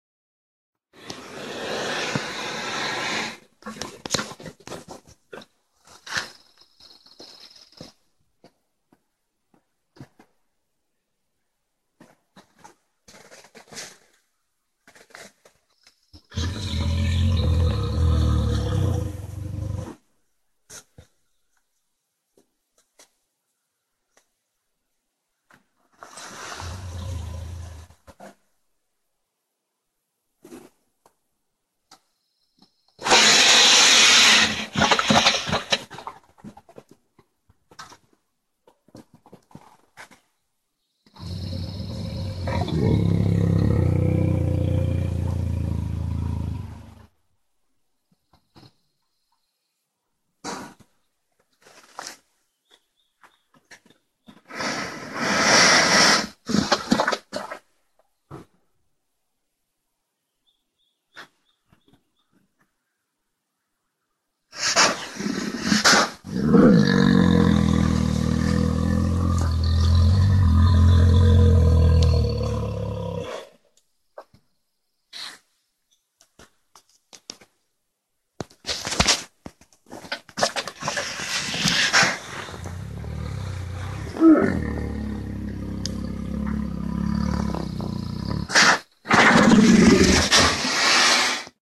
a bear vocalization , real life recording